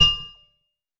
An short electronic mallet like glassy metallic sound. Created with Metaphysical Function from Native
Instruments. Further edited using Cubase SX and mastered using Wavelab.